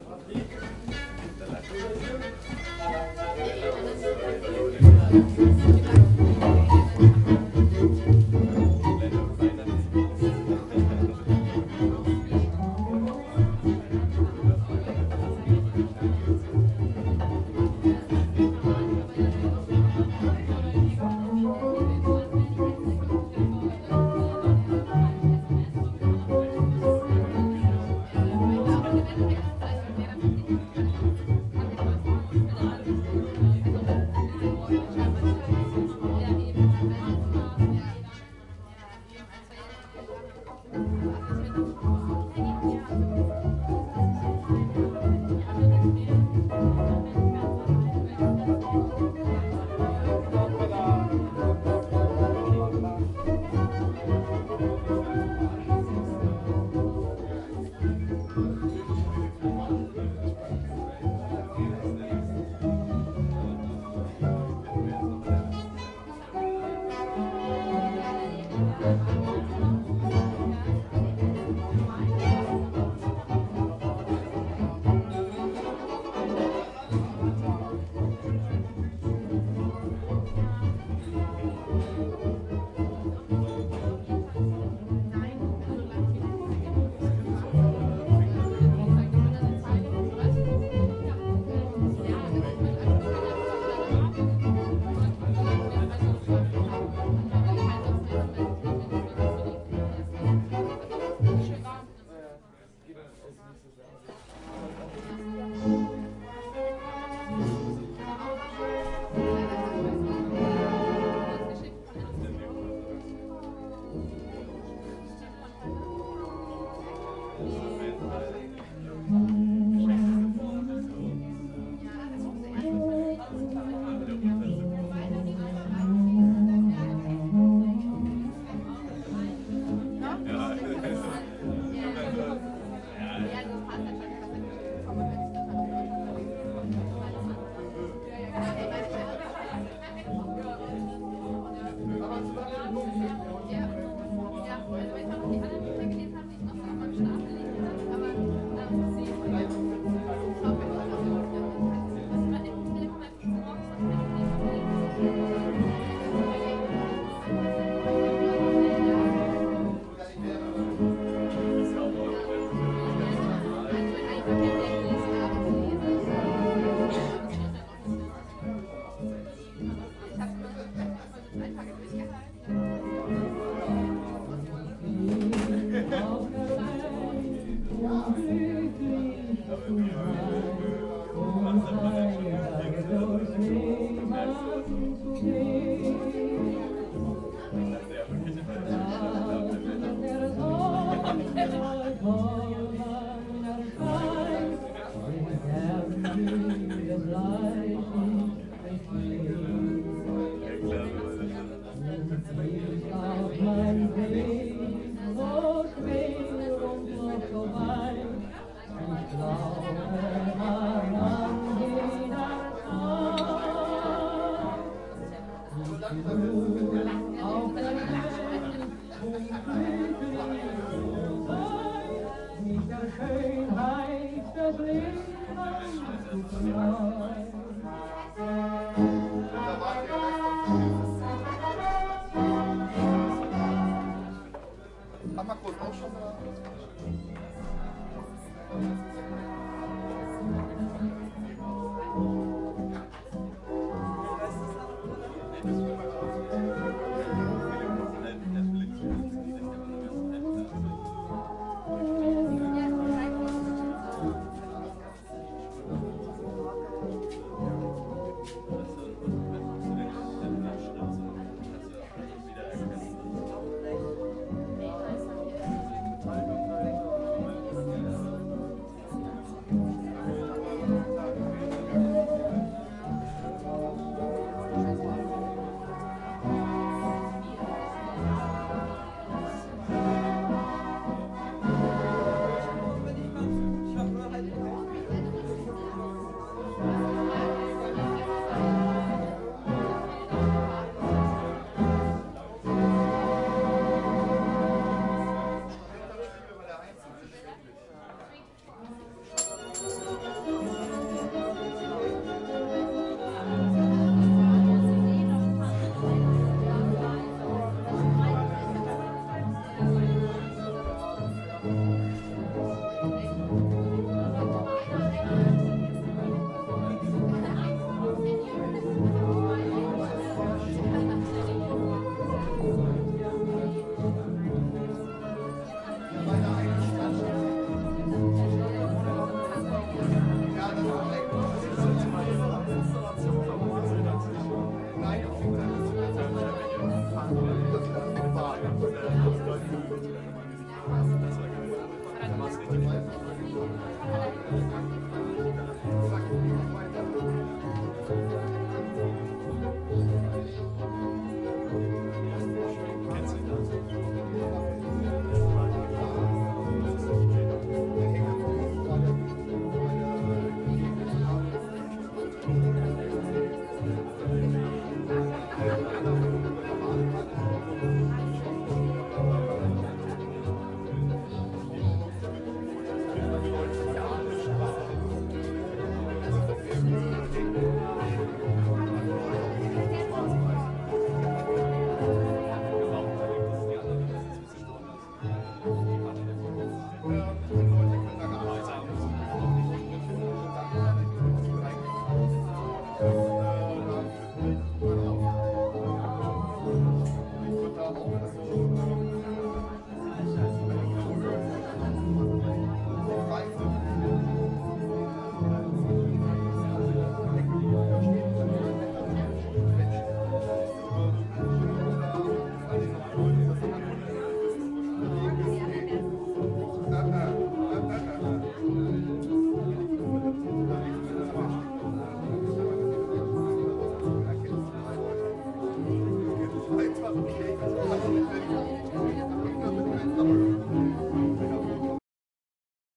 Sounds of the Zur Wilden Salon (now closed) with 1920s music
Zoom H4N recording of Berlin cabaret nightlife.
berlin, travel